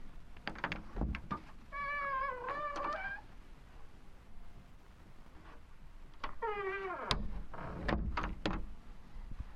Door Open Close.L
A door creaking open, then closing
Door, sound-effect, Creak